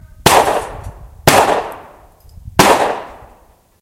9mm target side metal
this has a nice slow group of 3 shots, with the first shot giving a small amount of metal scraping.
report, impact, field-recording, gun, 9, metal, 9mm, fire, pistol, target